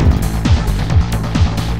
MC505 Drumloop Nr3 robzy
made with the roland mc 505.
hf with it!
greetings from berlin city!
electro, drum, mc505, drumloop, real, 505, roland, sequence, analog